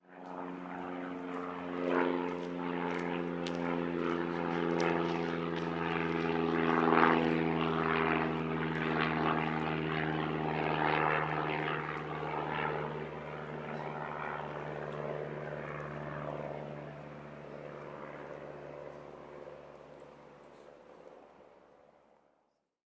A small propeller plane
A little propeller plane flying over my head while i`m recording nature-sounds :)
Equipment used;
Zoom H4n pro
Microphone Sennheiser shotgun MKE 600
Triton Audio FetHead Phantom
Rycote Classic-softie windscreen
Wavelab